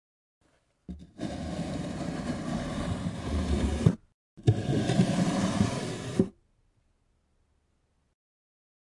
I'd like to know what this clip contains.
cierra tapa caja madera
madera, Close-up, wood, open, squeaky, close, wooden, box, caja